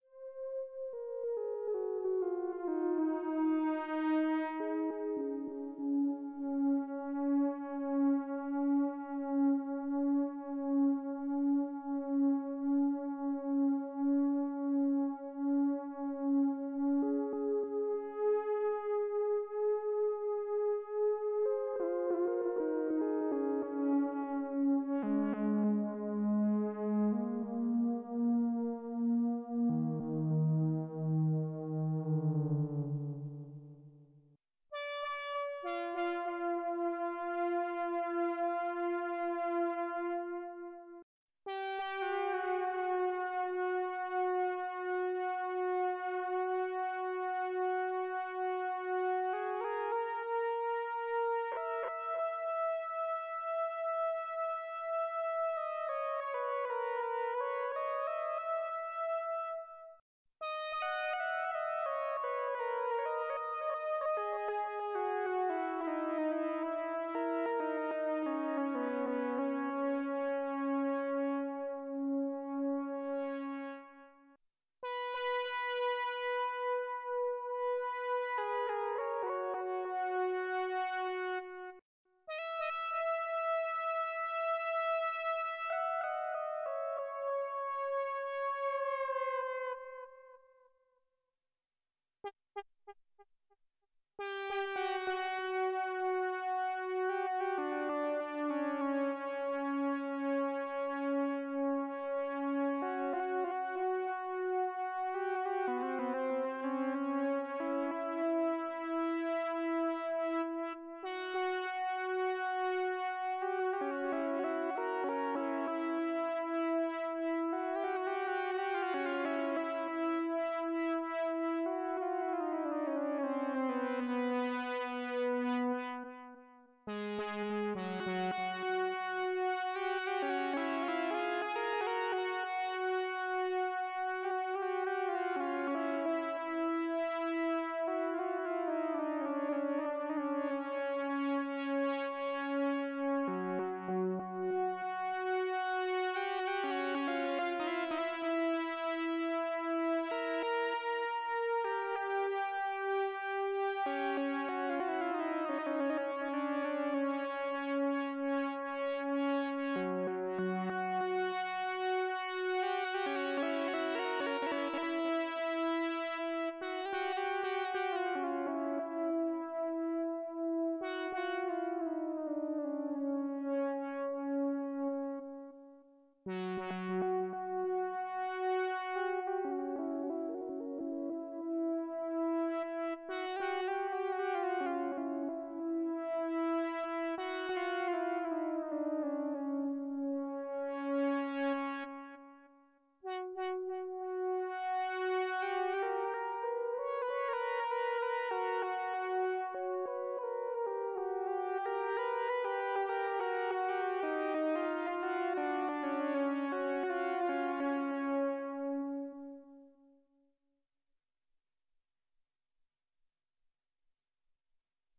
Minimoog lead solo
My friend and I had rented a Minimoog Model D (c1977, not the reissue). We were jamming out some ambient-type sounds, and my mate is just getting lovely tones out the Moog, going through a Deluxe Memory Man Echo/Chorus/Vibrato for extra analogue niceness. Listen as he goes full-on John Paul Jones on In the Light around the 50 second mark.
solo, synth, moog, lead, analog